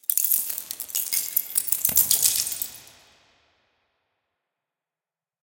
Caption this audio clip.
Dropped, crushed egg shells. Processed with a little reverb and delay. Very low levels!
crackle, crunch, crush, drop, eggshell, ice, splinter